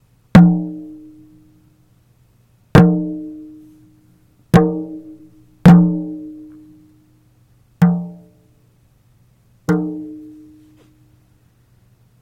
Hand Drum
a simple wooden handdrum.
recorded with an AKG Perception 220.
percussion, drum-sample, handdrum